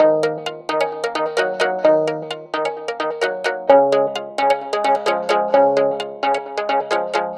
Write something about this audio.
130BPM
Ebm
16 beats
Synth, Sculpture, Logic